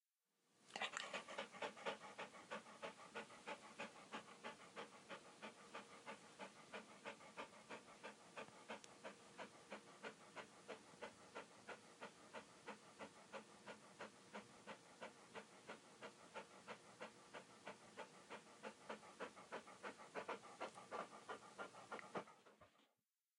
dog panting
My dog Lola breathing excitedly before a walk.
dog-breath, dogs, dog-panting, animal, breath, dog-breathing, panting, dog, breathing, breathe, pant, pet